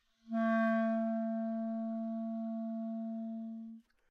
Part of the Good-sounds dataset of monophonic instrumental sounds.
instrument::clarinet
note::A
octave::3
midi note::45
good-sounds-id::1549